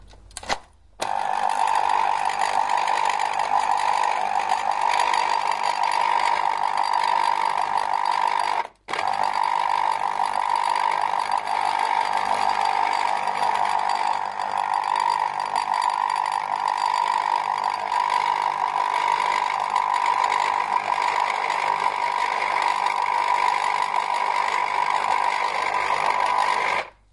orange juice glass ring
making orange juice with an electric juicer, with a ring from the glass.
Edirol R-1
orange-juice, juicer, machines, field-recording